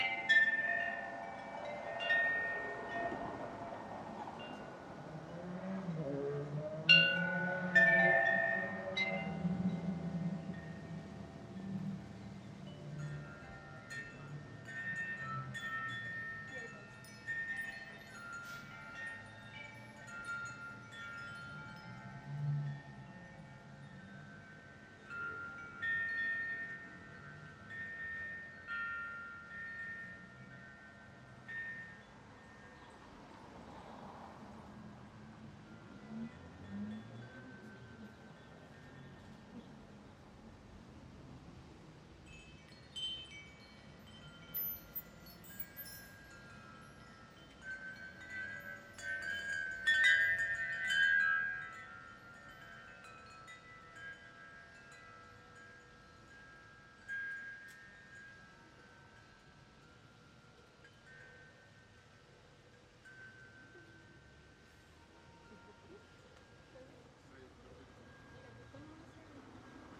wind chimes
chimes, wind, wind-chimes